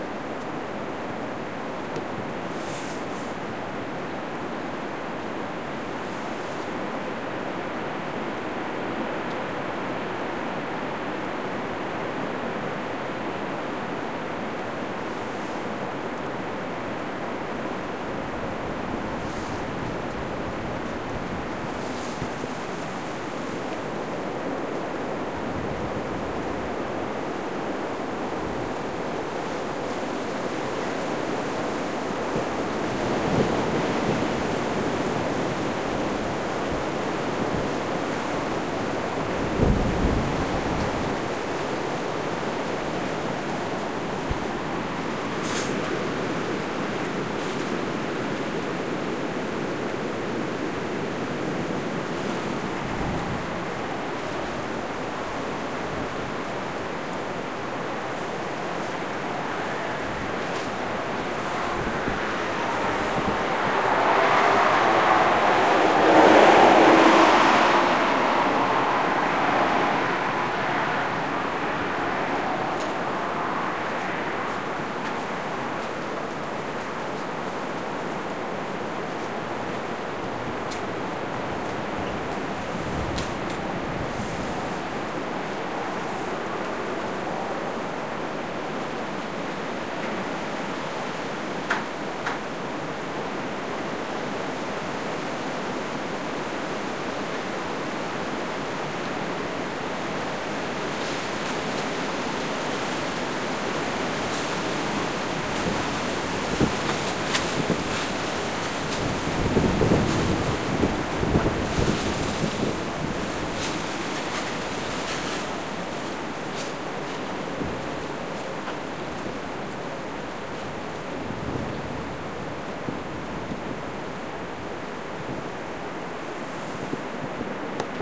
stephanie - the storm 02 - feb 14 - South Portugal
wind, storm, ambient, field, recording